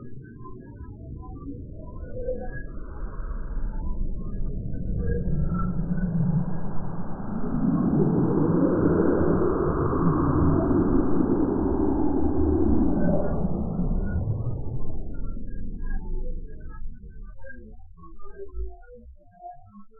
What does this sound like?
Another batch of space sounds more suitable for building melodies, looping etc. Passing star.